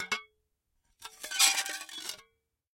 Small glass plates being scraped against each other. Grating high pitched scrape. Close miked with Rode NT-5s in X-Y configuration. Trimmed, DC removed, and normalized to -6 dB.
glass, noisy, scrape